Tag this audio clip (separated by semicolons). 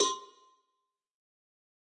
cowbell; god; home; metalic; record; trash